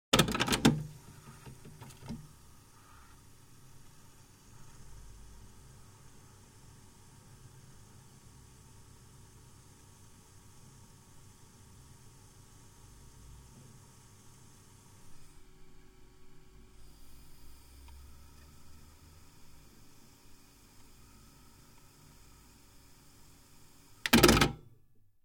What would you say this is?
cassette deck play audio cassette 01
Sound of a cassette deck, playing an audio cassette.
Recorded with the Fostex FR2-LE and the Rode NTG-3.